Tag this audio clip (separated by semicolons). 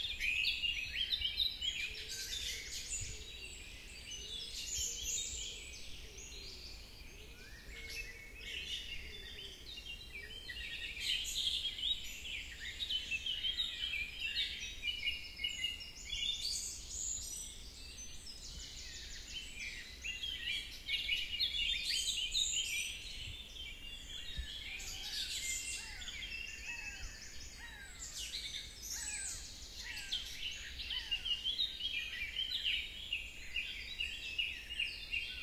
Birds; Countryside; Field-recording; Forest; Germany; Nature; Park; Peaceful